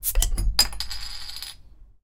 Unsealing of a beer bottle;
The gas comes out of the bottle;
Beer cap falls onto the table;